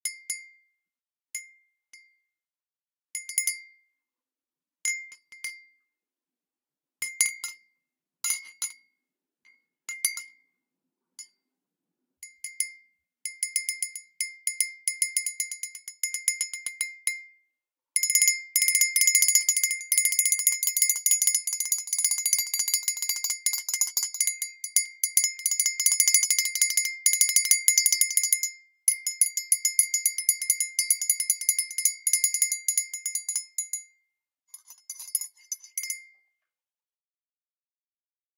coffee cup spoon
Sounds of a ceramic coffee cup being hit with a steel spoon, single hits and repetitive fast hits for imitating a bell of sorts. CAUTION: may get noisy and ear-piercing ;)
I recorded this for my own sound design purposes (game SFX) and thought I would share it with anyone who may find it useful - if you do, please help yourself and enjoy!
household-objects, ringing